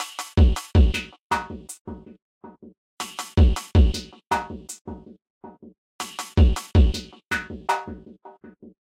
electro drum (80bpm)
an drum kick with a little distortion and high hat.made in ableton.
electro, drum